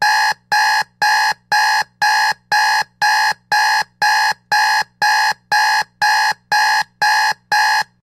The terrible alarm sound from an old clock radio alarm. Recorded with an AT4021 mic into an Apogee Duet.
noise, awake, clock, beep, alarm, radio